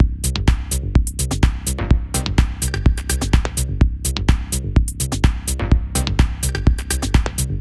If you would like more techno loops, I can produce more
minimal-house, 126-bpm, dance, drum-loop